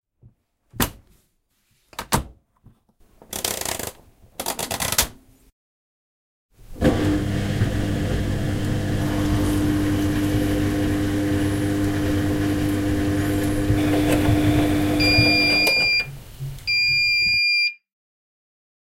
KitchenEquipment WashingMachine Stereo 16bit
messing with the washing machine